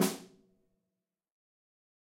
Single stereo snare hit by a drum stick.